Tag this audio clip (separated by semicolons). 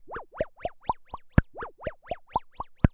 electronic; loop; synth; wobble